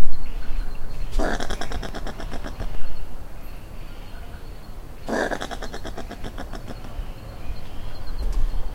A protective possum getting defensive as I walk past her tree